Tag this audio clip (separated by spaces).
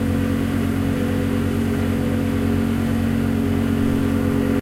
tumbler drone